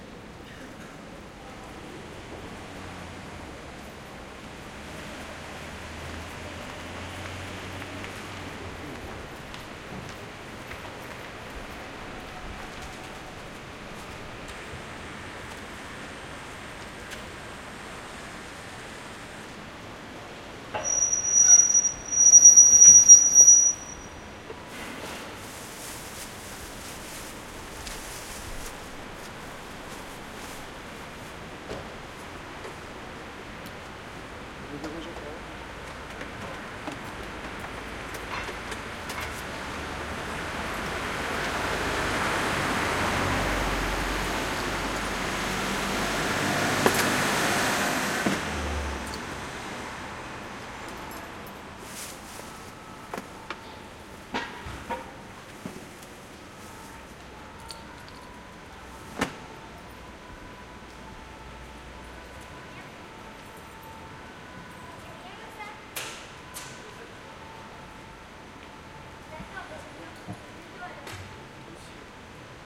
city square calm quiet city tone and occasional cars pass child voice distant ambulance Marseille, France MS
calm, city, France, Marseille, quiet, square, tone